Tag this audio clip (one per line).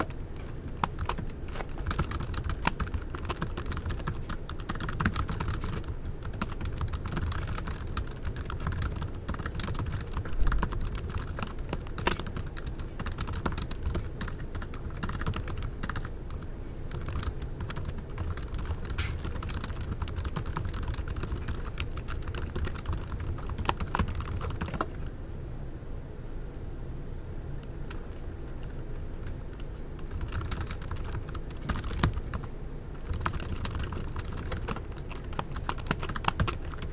keyboard
keys
lo-fi
macbook